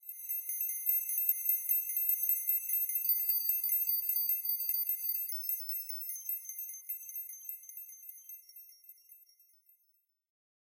Bright digital GUI/HUD sound effect created for use in video game menus or digital sound application. Created with Xfer Serum in Reaper, using VSTs: Orbit Transient Designer, Parallel Dynamic EQ, Stillwell Bombardier Compressor, and TAL-4 Reverb.
application, artificial, automation, bleep, blip, bloop, bright, click, clicks, command, computer, data, digital, effect, electronic, game, gui, hud, interface, machine, noise, pitch, serum, sfx, short, sound-design, synth, synthesizer, windows